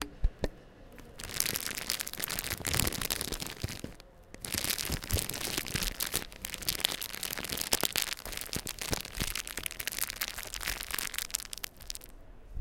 PLASTIC BAG 01
plastic, ambient, glitch